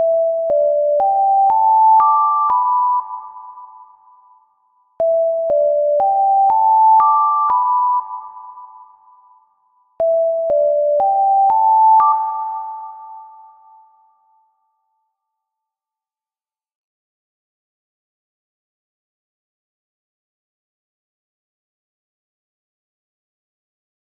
TAI cay saati alarm less reverb
Tea spoon recorded with a cheap headset mic. So it has a background noise. The alarm produced using sine wave+reverb.
This one has less reverb.
spoon, tea, alarm, time